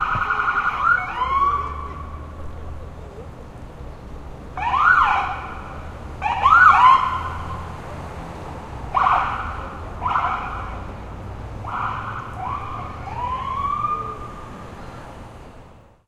WaHi siren chirps
Intermittent ambulance siren chirps in NYC traffic.